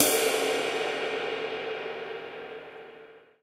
12 Ride Long Cymbals & Snares
drum, bubinga, drumset, one-shot, crash, turkish, metronome, hi-hat, click, sticks, snare, wenge, one, custom, cymbals, cymbal, shot, ride